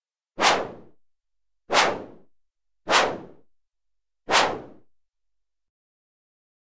f Synth Whoosh 17
Swing stick whooshes whoosh swoosh